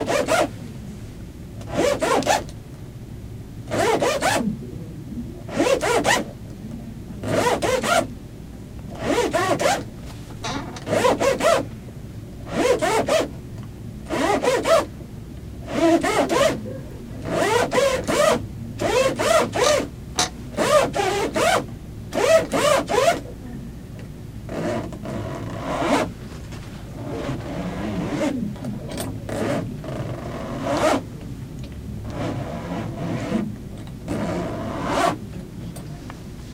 YVONNE zipper
The sound of a zipper.